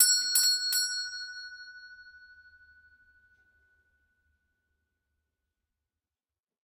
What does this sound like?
FX Doorbell Pull without pull Store Bell 03
Old fashioned doorbell pulled with lever, recorded in old house from 1890
bell, Doorbell, Pull